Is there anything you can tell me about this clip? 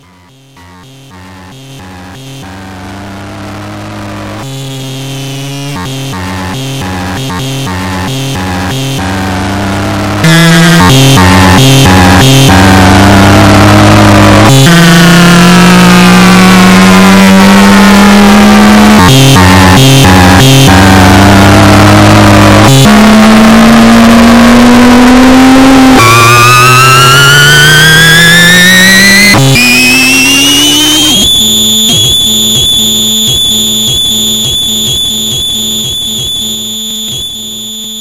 a spaceship sends its ID to a Beacon. As the speed is near Warp 0.5 the signal becomes more and more distorted at the end of the transmission
alien, broadcasting, communication, cyborg, galaxy, radio, spaceship, UFO